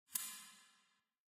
Metallic sounding UI interaction sound effect with reverb, good for UI hovering, click or other events.